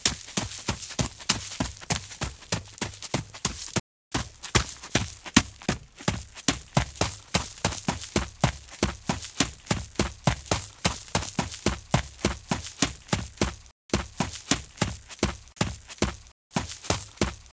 Running foley performance 2
Extended foley performance, abridged.
dead-season, dirt, fast, foley, grass, run, shoe